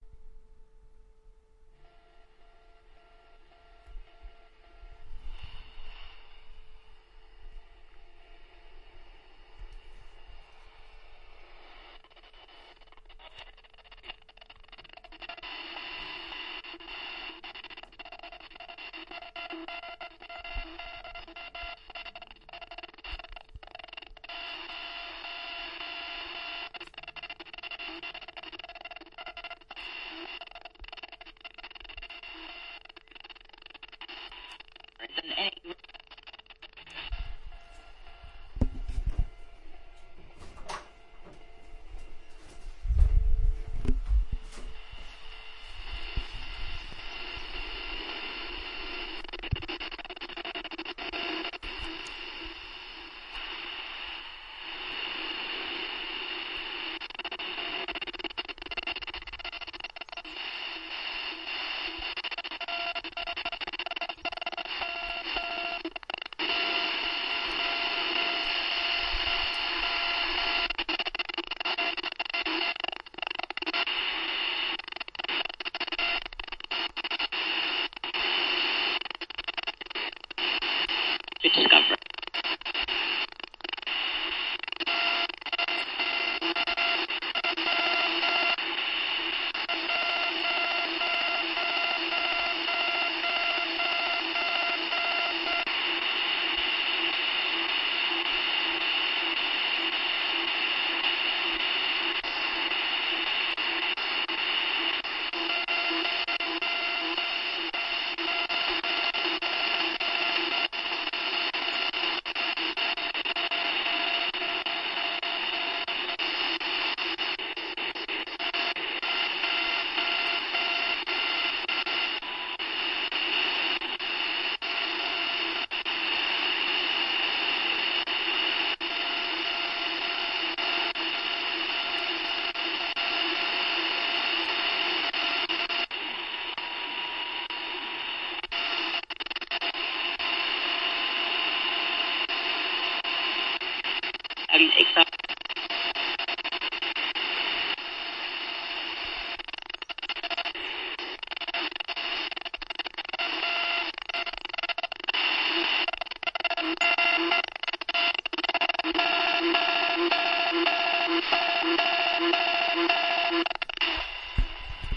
Radio Static
Just the sound of me fiddling around on the AM Frequency on my little transistor radio.
Radio, Noise